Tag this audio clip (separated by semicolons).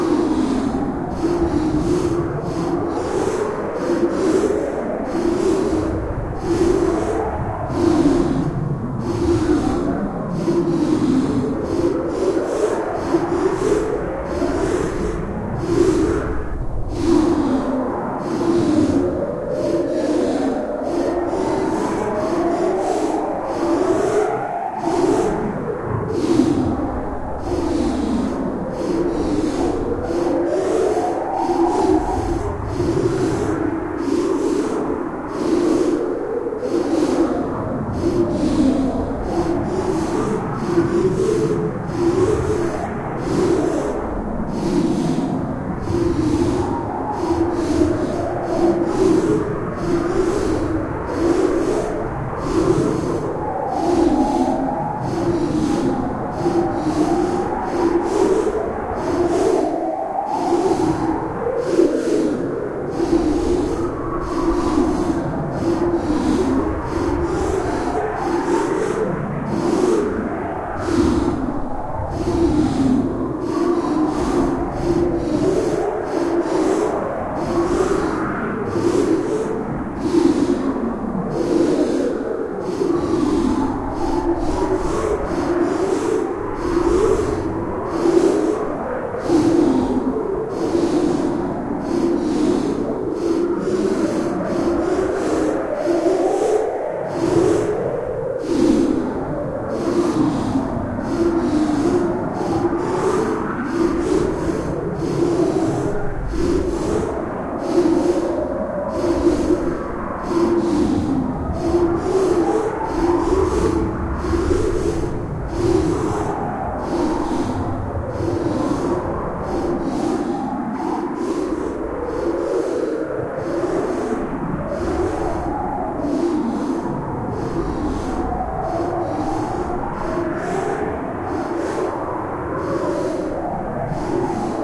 sam; pm